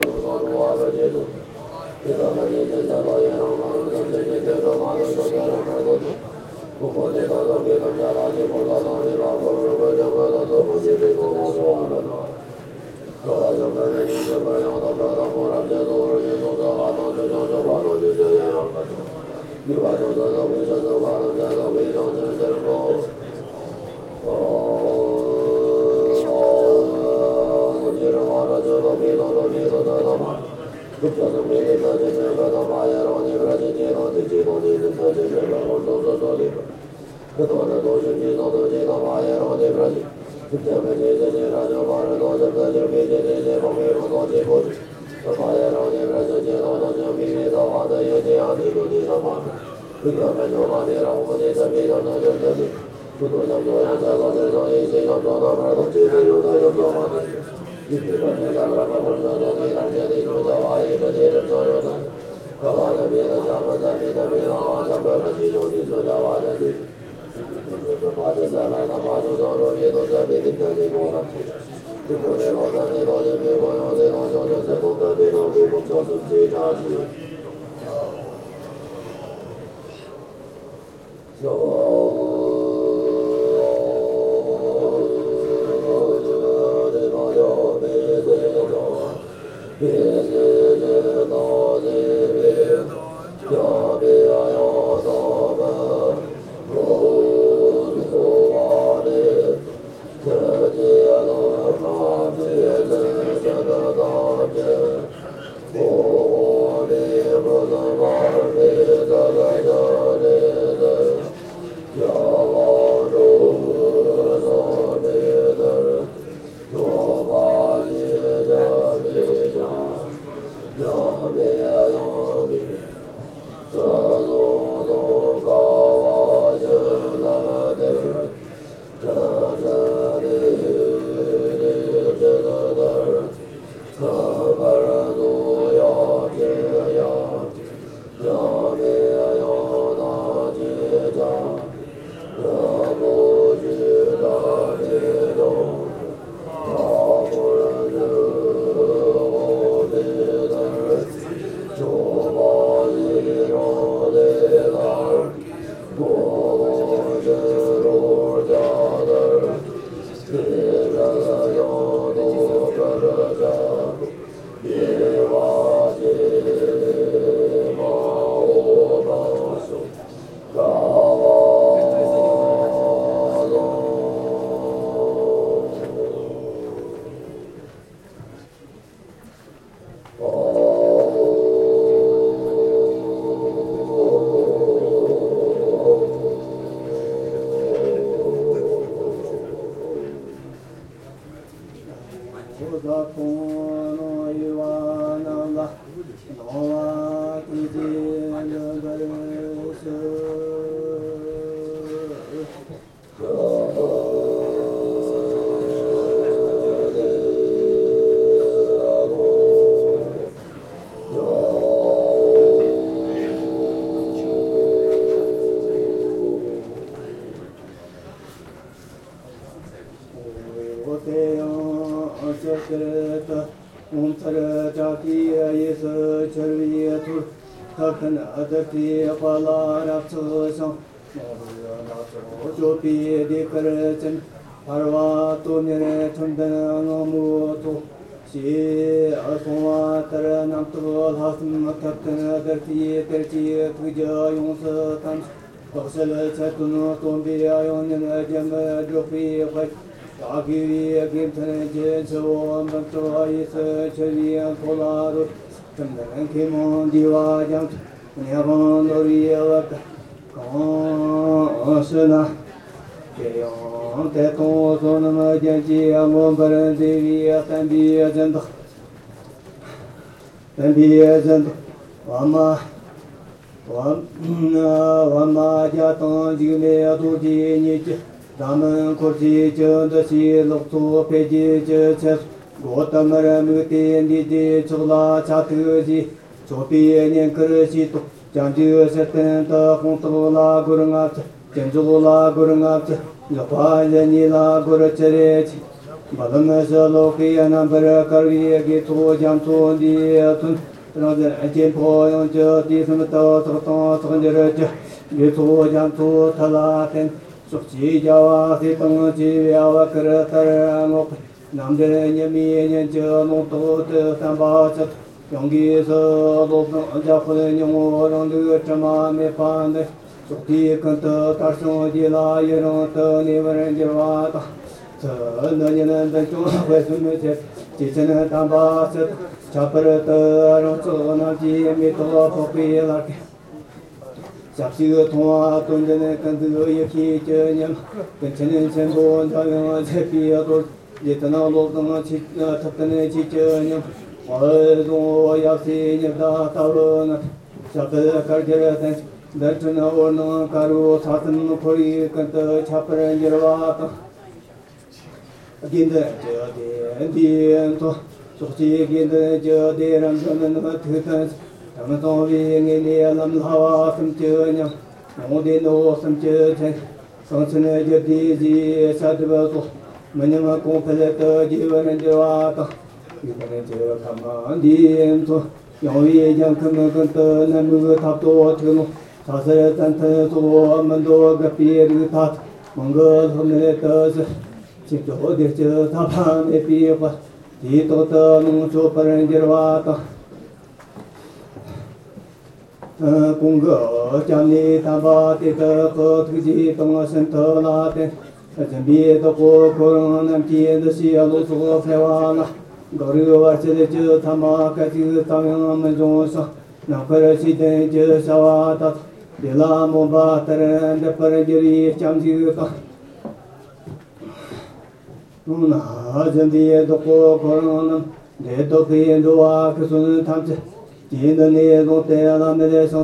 Buddhist chants in Labrang Monastery, Gansu, China
Buddhist chants in Labrang Monastery (Xiahé, Gansu, China)
Recorded in August 2018.